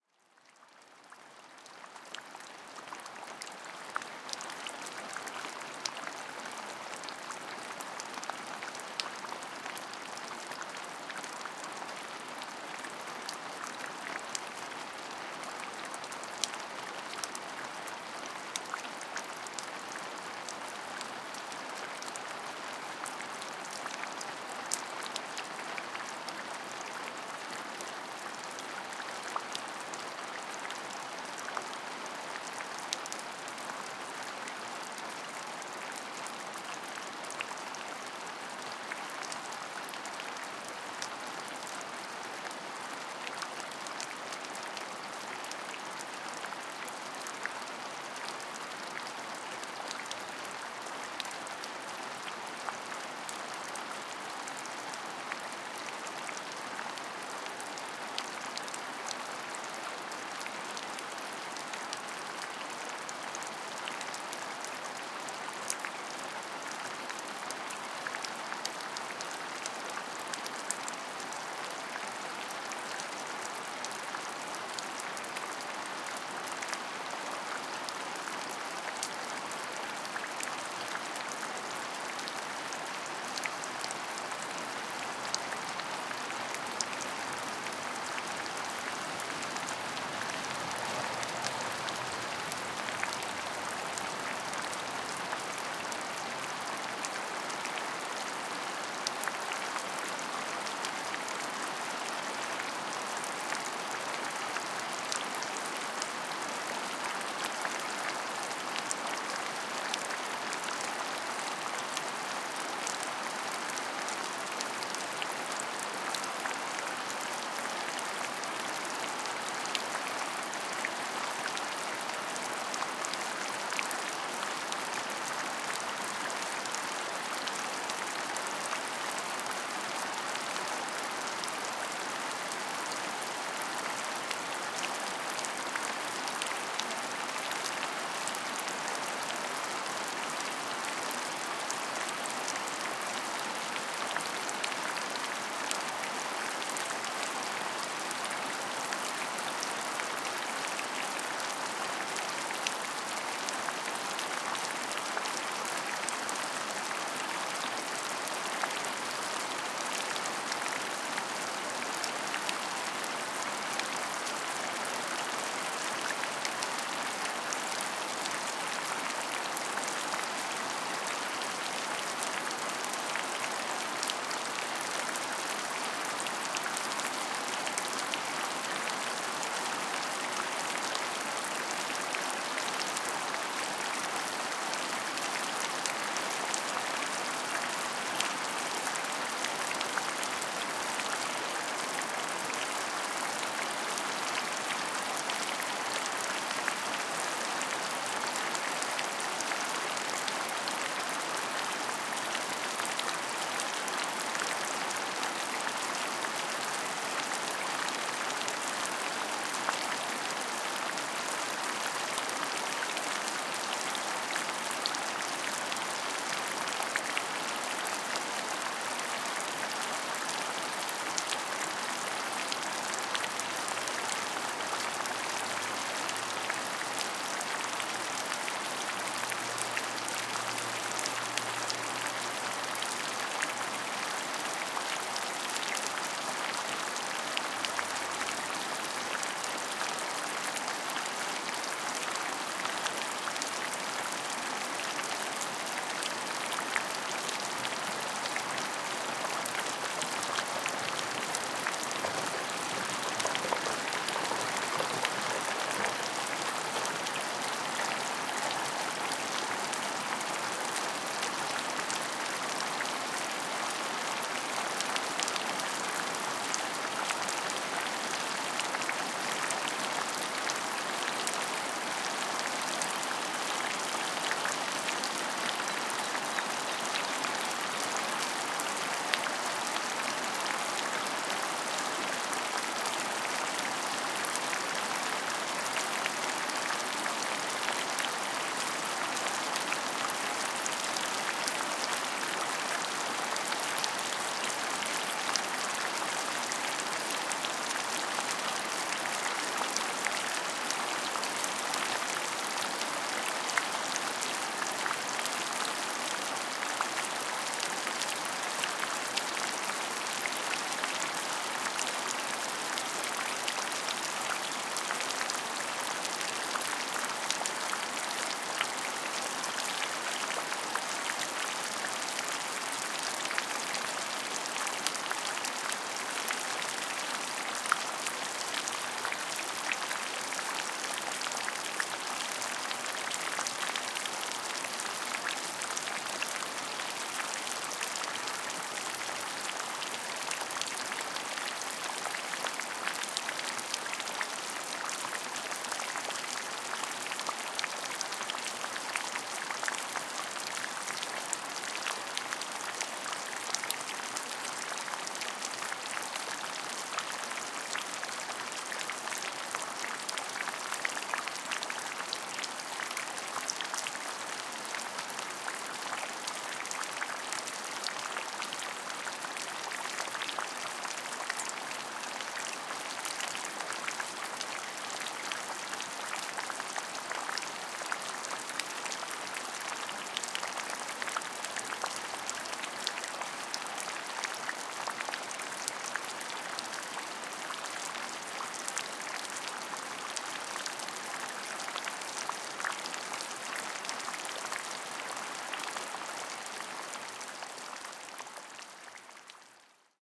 Rain heavy 1 (rural)
Rain dropping heavy. Rural land, without any surrounding sounds. Useful like FX or background. Mono sound, registered with microphone Sennheiser ME66 on boompole and recorder Tascam HD-P2. Brazil, september, 2013.
background, Sennheiser-ME66, FX, BG, drops, strong, rural, atmosphere, cinematic, field-recording, rain, mono, Tascam-HD-P2, light